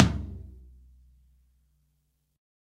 Low Tom Of God Wet 006
kit, drum, drumset, low, tom, set, realistic, pack